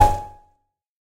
STAB 012 mastered 16 bit from pack 02
A short electronic sound, usefull as percussion sound for a synthetic drum kit. Created with Metaphysical Function from Native
Instruments. Further edited using Cubase SX and mastered using Wavelab.